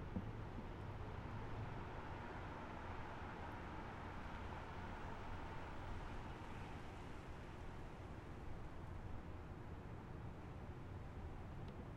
A car drives by